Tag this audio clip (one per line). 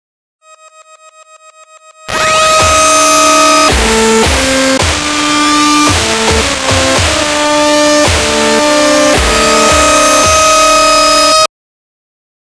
EL
horse